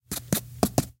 writing-short-16
Writing on paper with a sharp pencil, cut up into "one-shots".
paper, sfx, sound, write